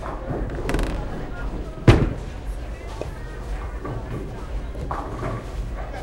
Bowling alley ball return
alley, bowling-balls